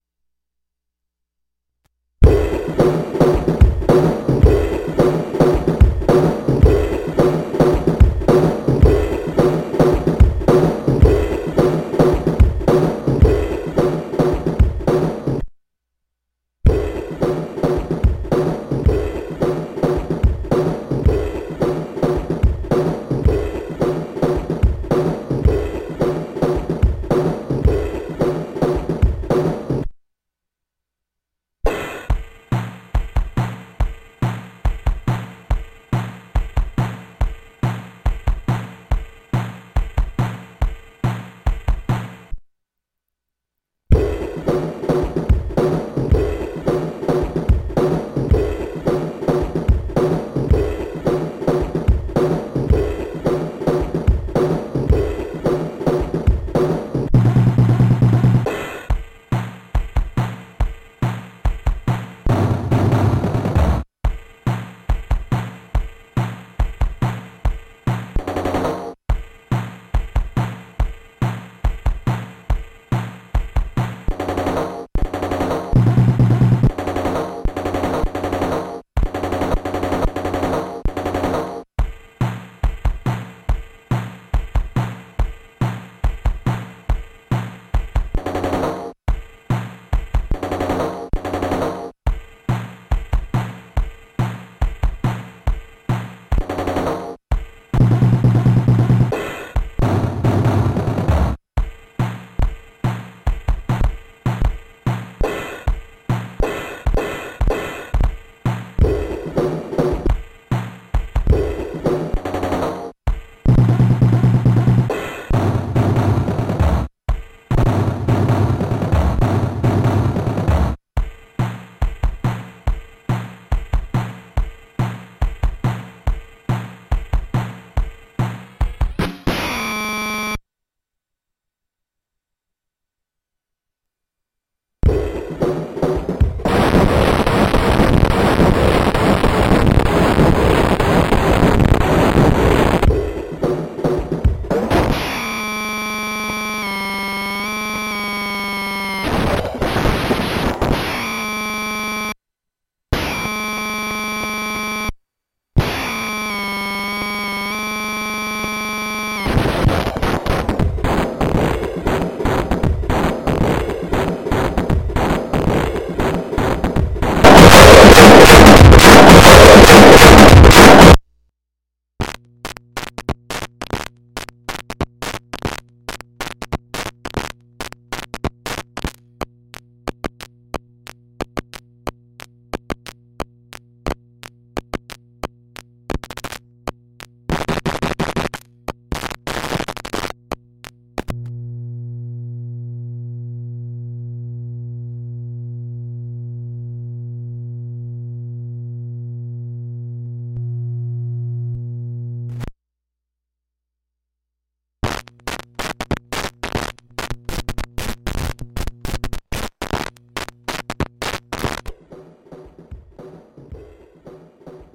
Micro Jammers Drums from 1993 by Cap Toys Inc. Removed speaker and routed into Line-In of Zoom ZH1.